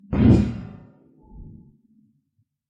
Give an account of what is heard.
tin plate trembling